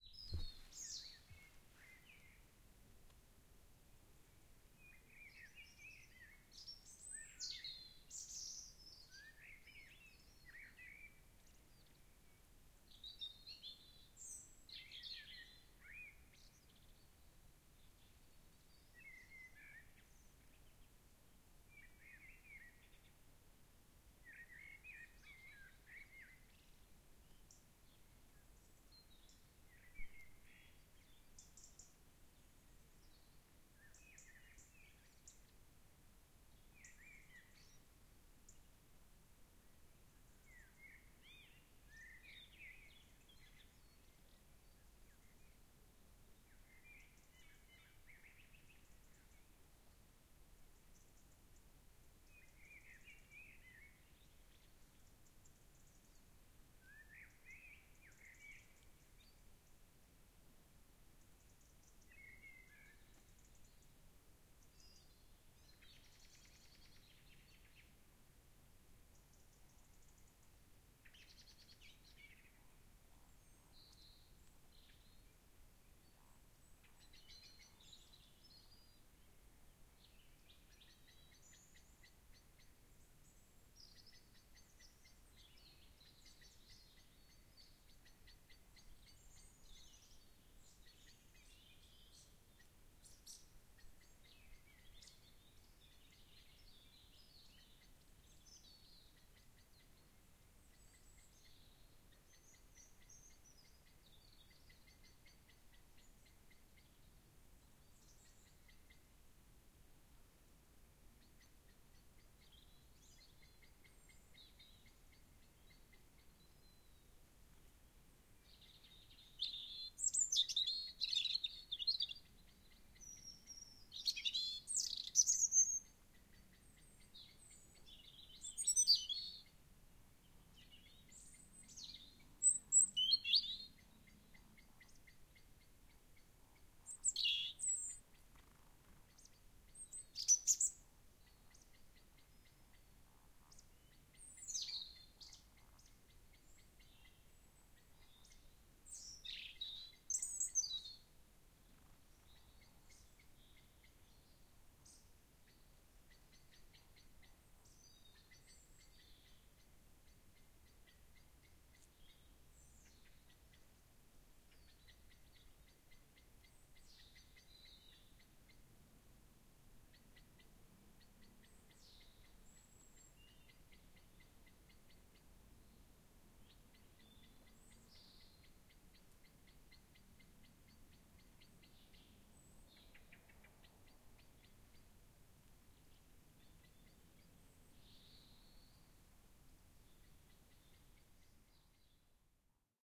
Forrest birds Norway
Birds and forrest ambience
ambience, Birds, field, forrest, Norway, recording